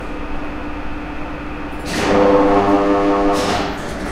An old Soviet/Russian elevator running on low speed.
Before an elevator reaches the stop point it enters precise stop point when its motor switches to the lower speed. When it runs on low speed it produces pretty industrial sound.
This is elevator nr. 1 (see other similar sounds in my pack 'Russian Elevators')